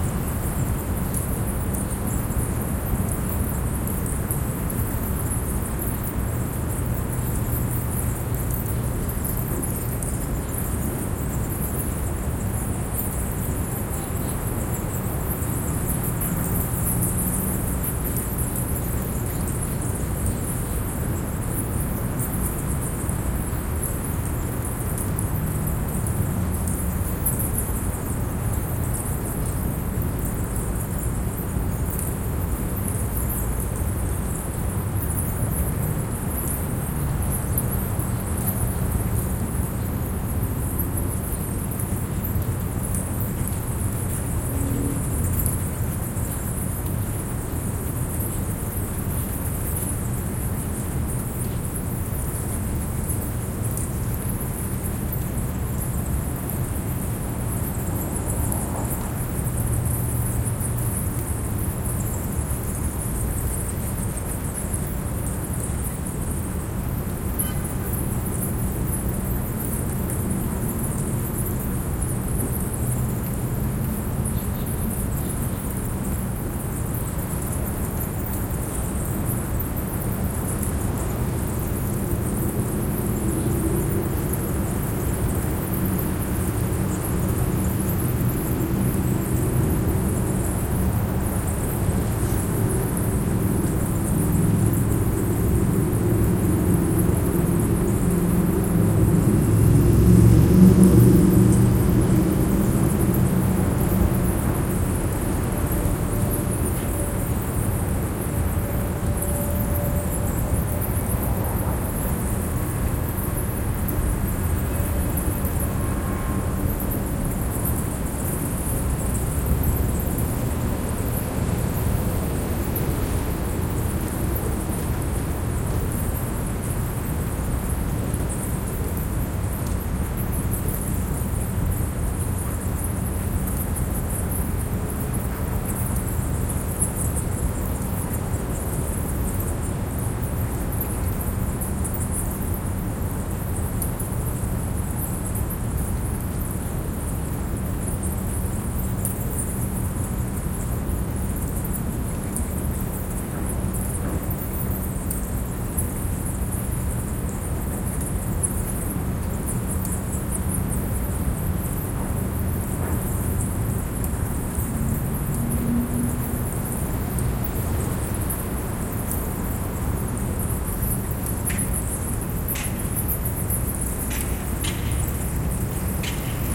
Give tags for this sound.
bats
field-recording
ambience
city

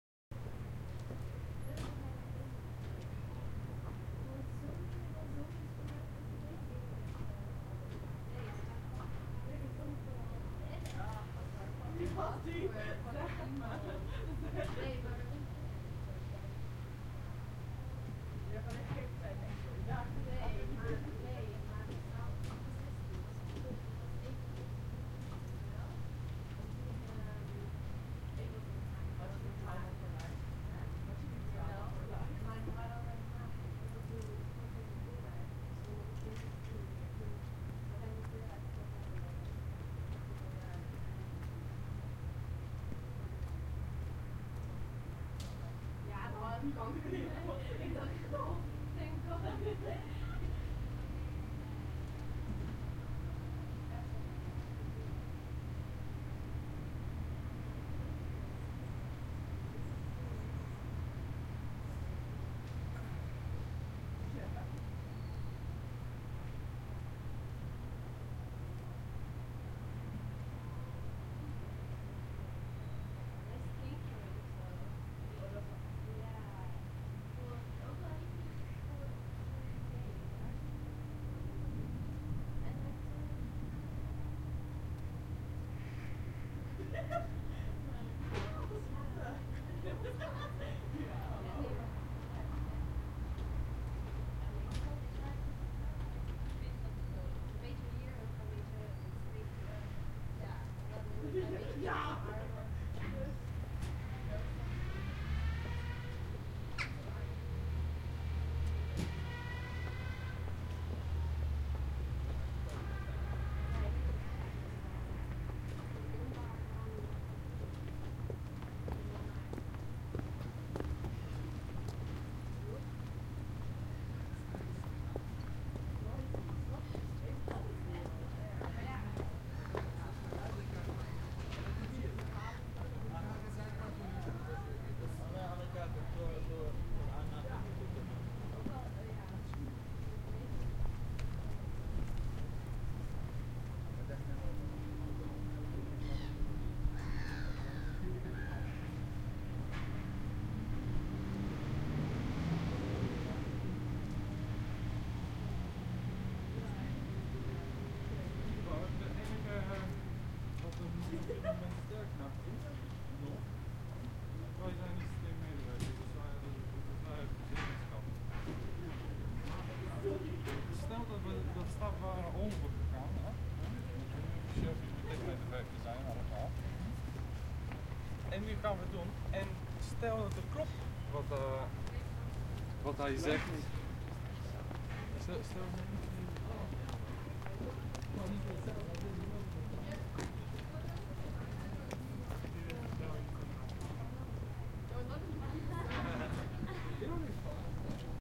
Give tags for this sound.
ambience
campus
exterior
field-recording
netherlands
public-building
university
zoom-h2

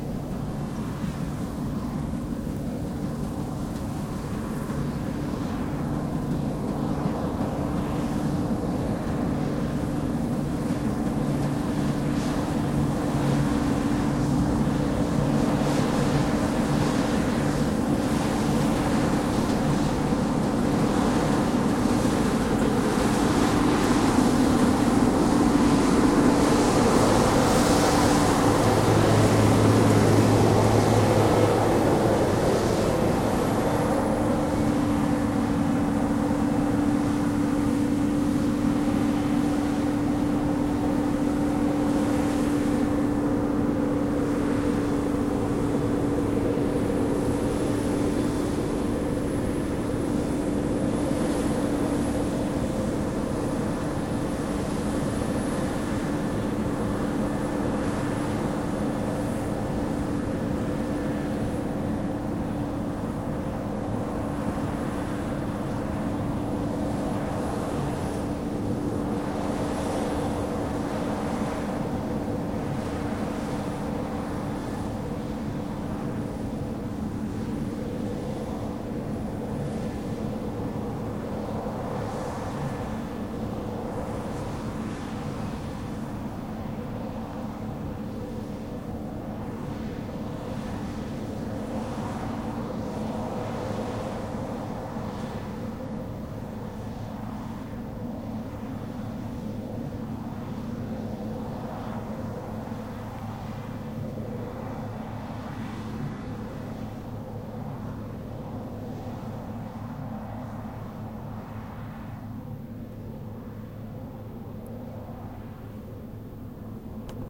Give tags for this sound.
Agriculture
Noise

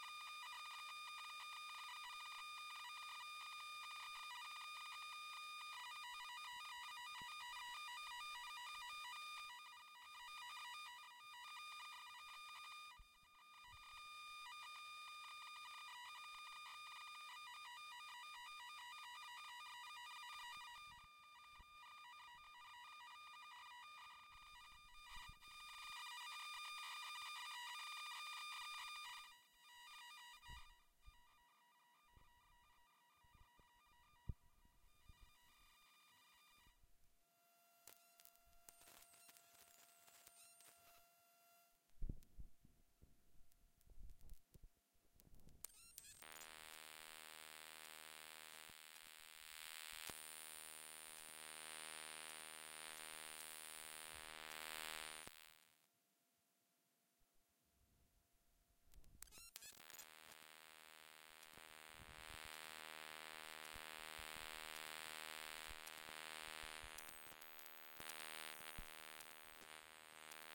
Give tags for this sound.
sample
electrical
noise

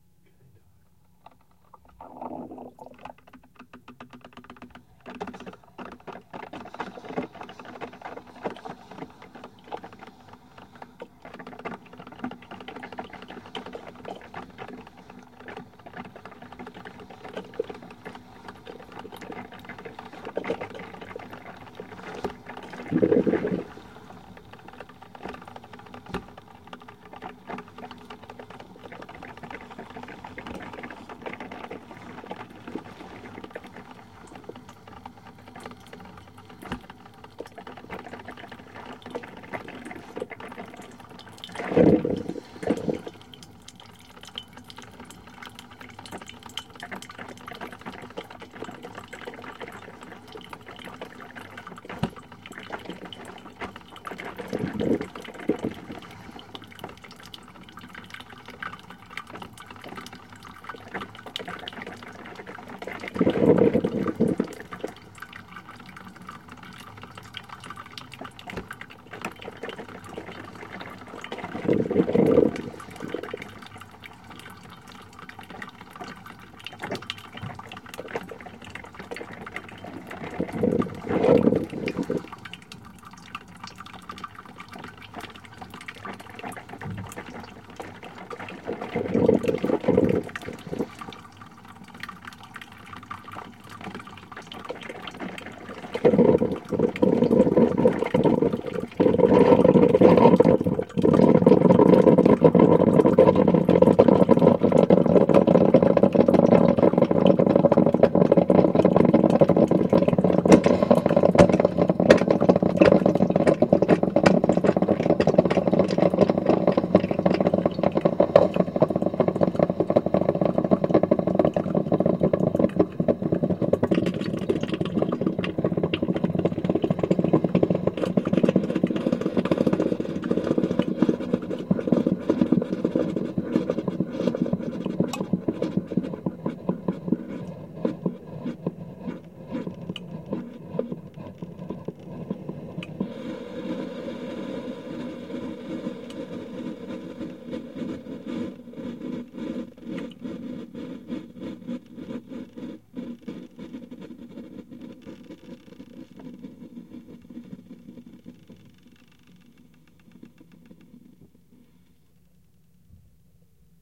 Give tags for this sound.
coffee-maker
suction
Electric
boiling
coffee
maker

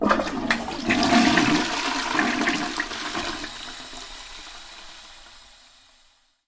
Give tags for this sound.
Flushing
Water
Toilet

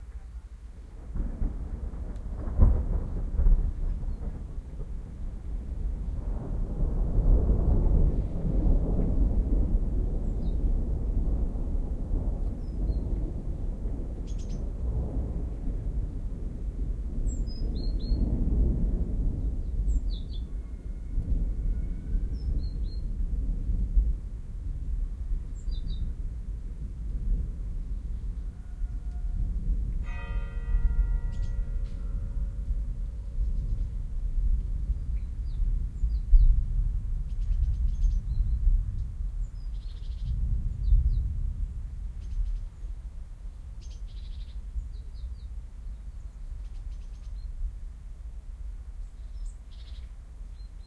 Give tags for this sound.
binaural
field-recording
thunder
thunderstorm

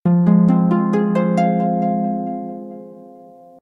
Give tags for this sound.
loops
guitar
bass

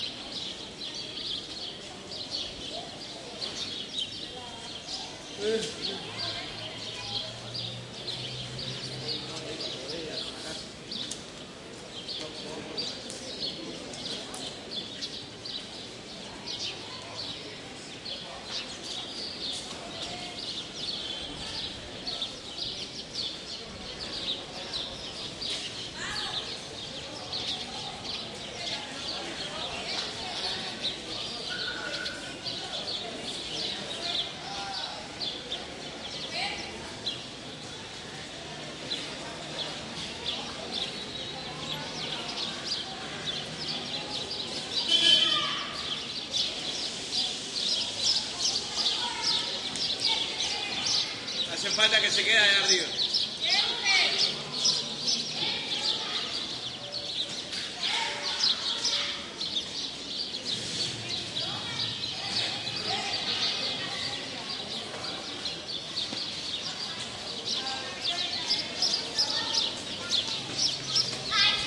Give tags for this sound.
kids
park
birds
cuba
walled

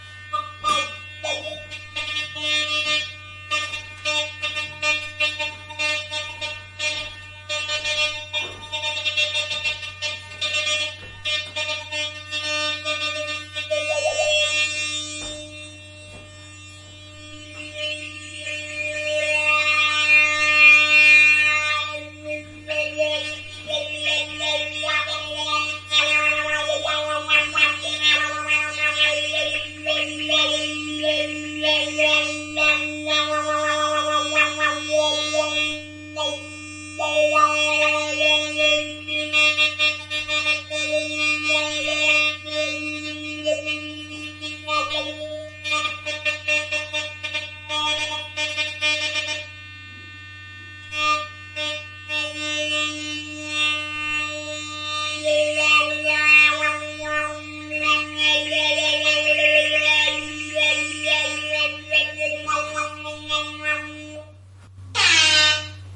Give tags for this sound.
ambient
sound
sample
effect
fx

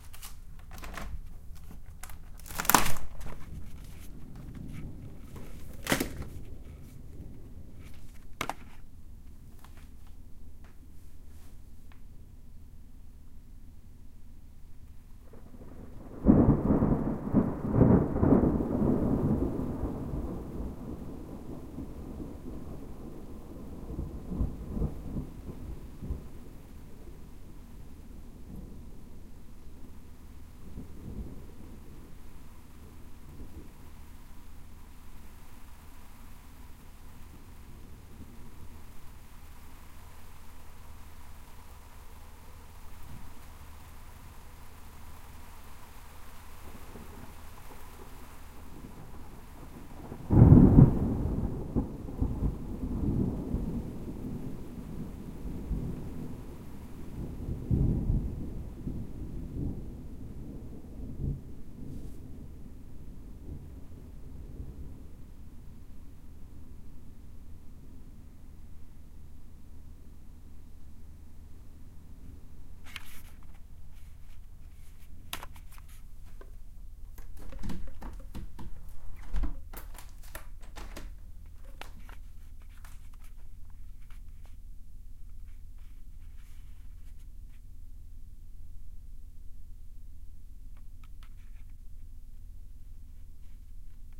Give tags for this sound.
Storm
Connecticut
Rain
Recording
Field
Night
Atmosphere
Wind
Weather
Window
Ambience

